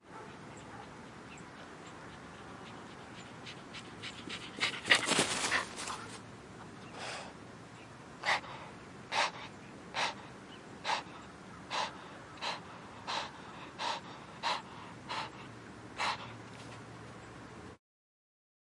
001 - Dog Running Up and Past, Panting
Field-Recording, Panting